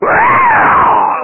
scream produced by the mouth